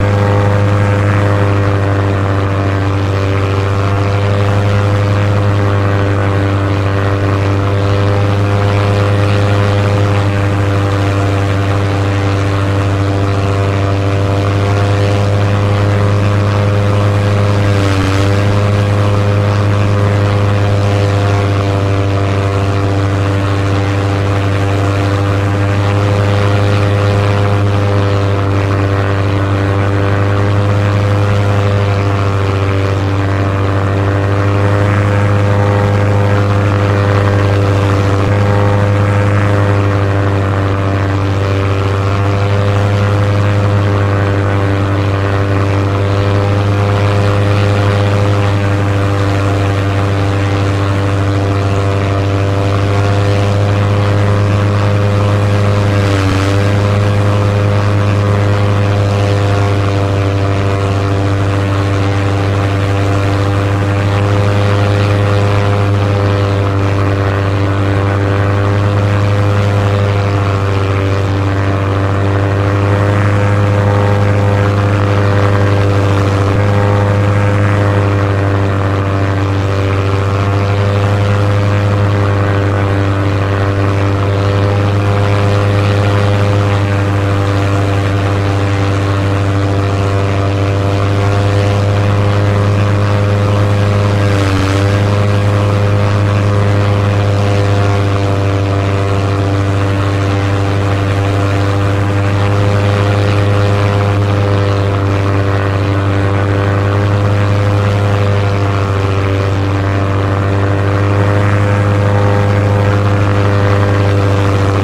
Prop Plane
Single Propeller aircraft flying in the sky. Loops just fine.